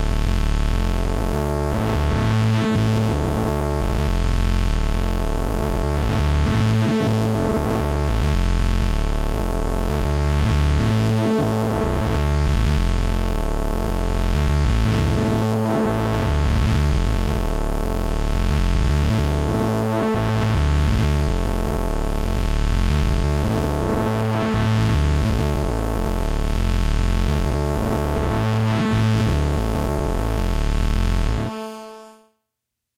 Mopho + Ineko
Something dirty I created with my Mopho and Alesis Ineko. No plans for it. So might as well see if someone has use for it.
bass, dirty, Ineko, Mopho, DSI, phase, pad